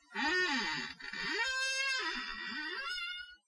leaning on my computer chair that desperately needs some WD-40.
creak, chair, squeak